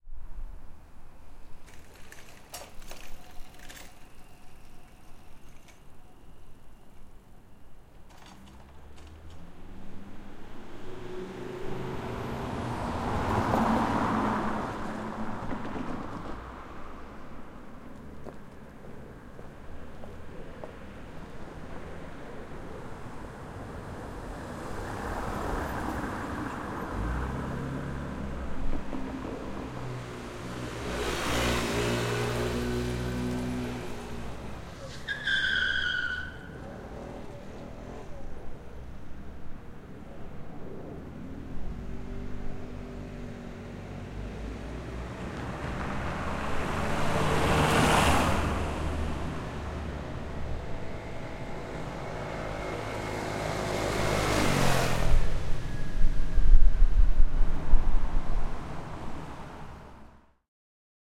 Nightly Dutch Traffic with Tire Squeaking
A night on the streets in Dutch town Hilversum. Recorded in stereo with Rode NT4 + Zoom H4.
nightly, traffic, city, car, moped, town, night, motorcycle, vehicles